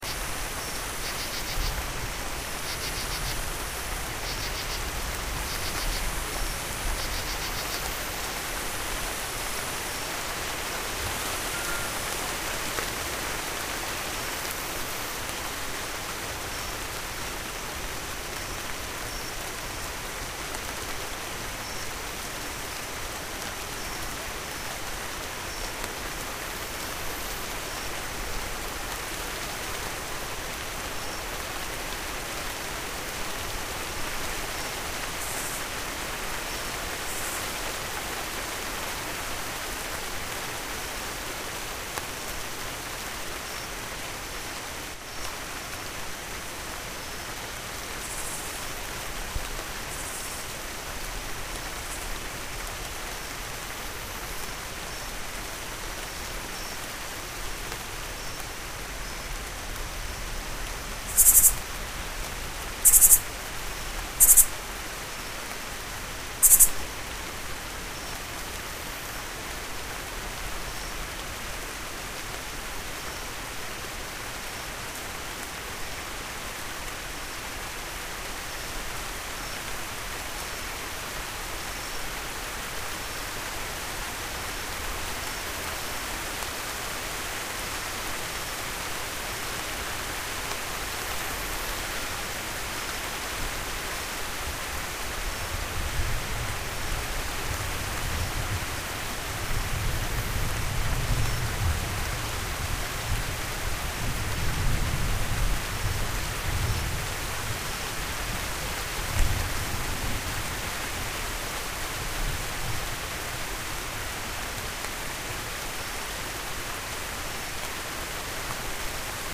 Stereo field recording of a late summer evening. A bit of thunder.
evening, thunder, crickets, quiet, field-recording, summer, bugs